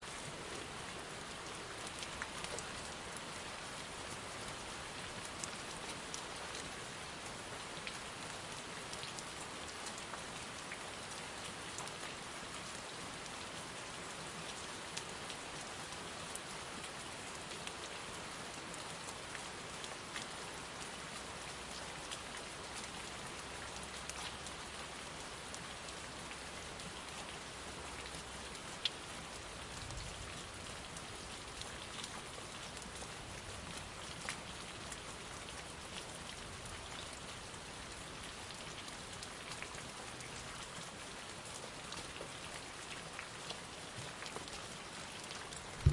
Rain - Running water
Rain with stream of water